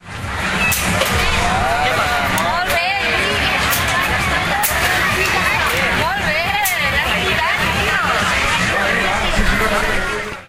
Fires - Tiro
This sound recorded with an Olympus WS-550M is the sound of the shots fair where you have to shot to a ball to get points.
shots, fun